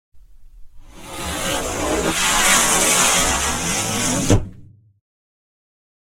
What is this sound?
warp-optimized
A warping sound I used to create a time machine effect.
Recorded with AT2050 in a soundbooth - high SNR.
Transformed in Reaper.
transformation, swoosh, space, time-machine, warp